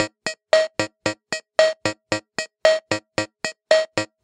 pss-130 rhythm pops
A loop of the pops rhythm from a Yamaha PSS-130 toy keyboard. Recorded at default tempo with a CAD GXL1200 condenser mic.